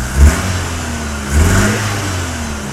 revving car engine